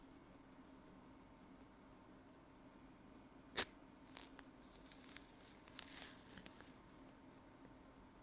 Peeling a Banana
I recorded the initial opening of a banana.